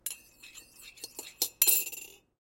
Ceramic coffee cup and metal spoon
coffee, cup, spoon